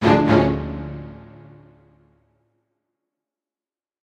String Hit 2
A lot of effort and time goes into making these sounds.
A string hit you might hear during a dramatic moment in a movie, show or video game. Or a radio play or whatever. This one has two chords instead of one!
Produced with Garageband.
cinematic-stings, big-hit, shock, dramatic-hits, orchestral, strings, drama, big-orchestral-hits, dramatic-hit, orchestral-hit, big-hits, shocking-moments, dramatic-moment, orchestral-hits, cinematic-sting, cinematic-hits, dramatic-orchestral-hit, cinematic-hit, string-hits, good-god-holmes, string, dramatic-orchestral-hits, cinematic-drama, dramatic-sting, shocking-moment, epic-hit, dramatic-stings, string-hit, epic-moment, dramatic-moments